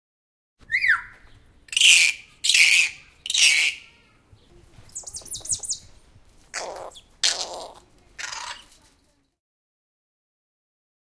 A kaka - native parrot to New Zealand - letting loose.